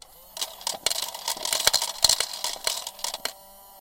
Recording of automatic change counter recorded direct with clip on condenser mic. From inside on the bottom with coins.